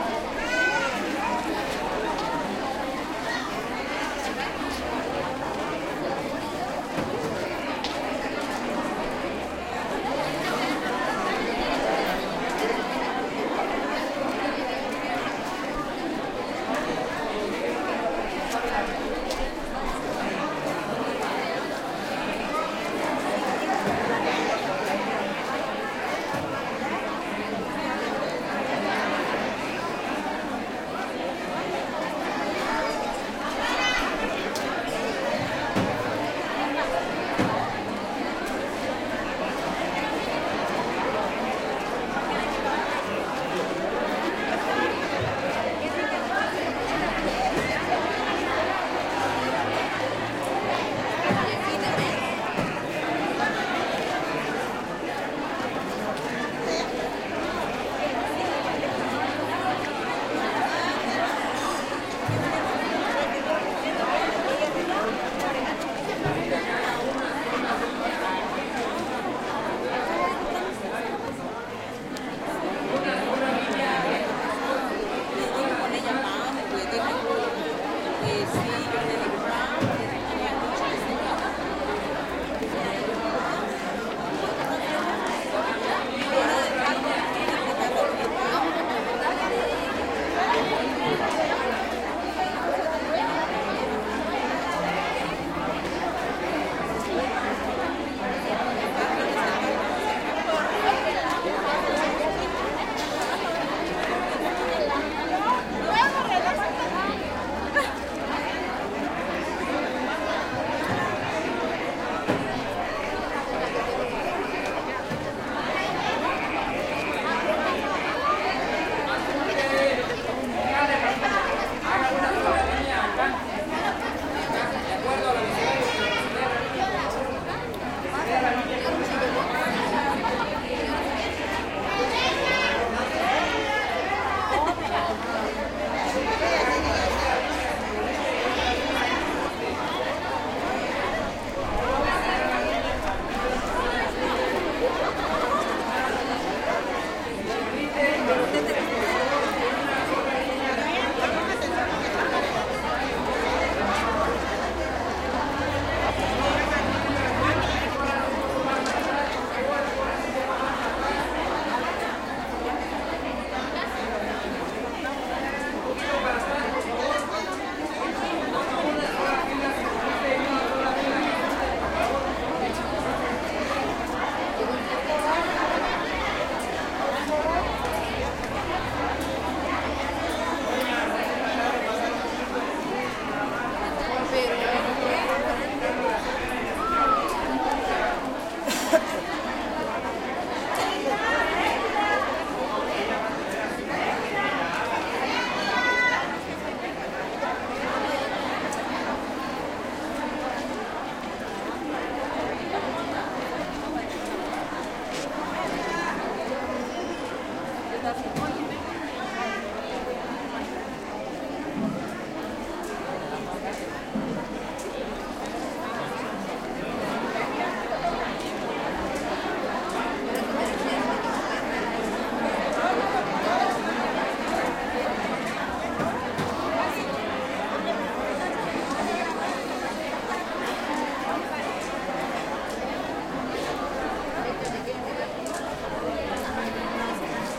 crowd int medium busy activity spanish voices municipal building waiting room hall1 Oaxaca, Mexico
spanish, Mexico, busy, voices, municipal, crowd, waiting, activity, room, medium, hall, Oaxaca, building, int